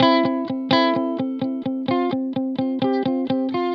guitar recording for training melodic loop in sample base music
electric, guitar, loop